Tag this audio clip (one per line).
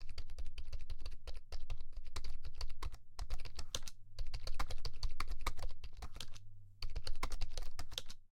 mouse
laptop
typing
clicks
mac
type
keyboard
clicking
click
computer
macbook